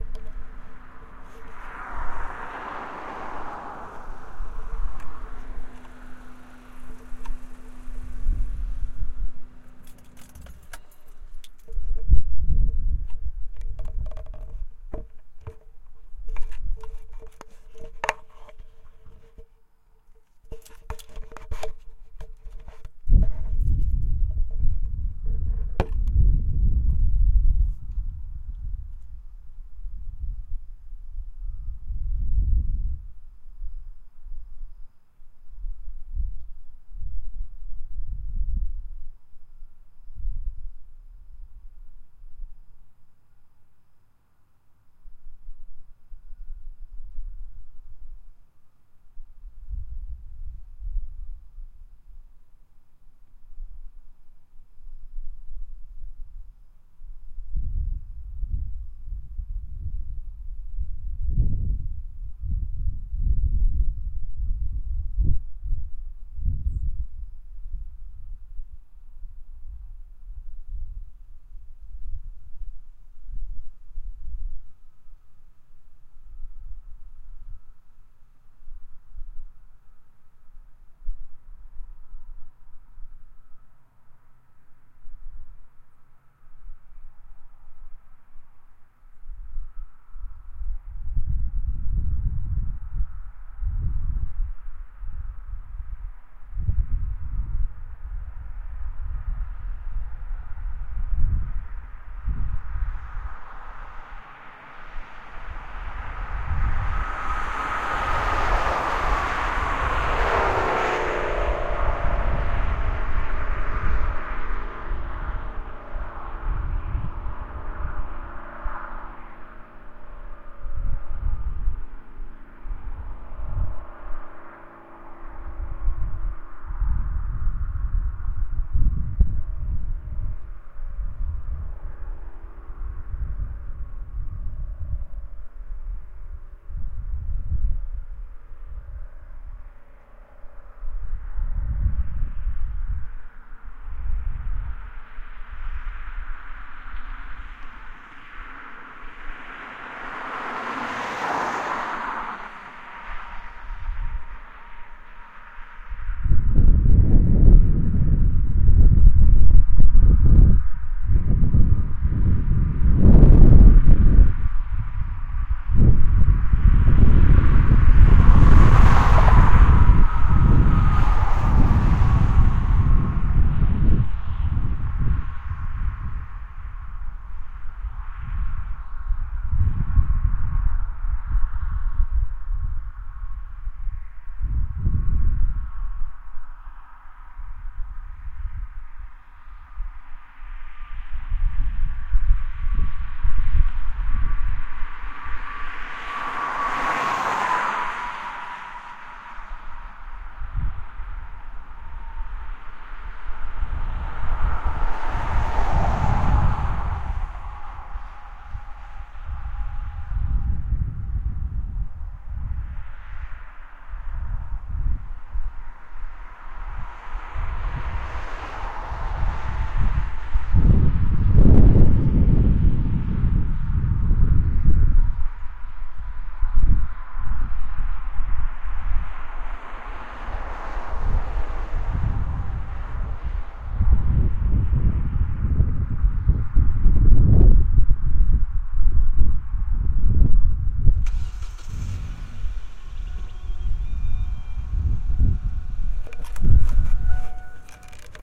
Midnight highway recorded on a Tascam digital recorder. Few cars drive by including cars, trucks, and one high performance vehicle. Also captures a dark feel and how this lonely highway still holds character.